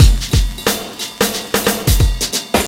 monster beat ride
funky beat loop raw dirty distorted drum
beat, dirty, distorted, drum, funky, raw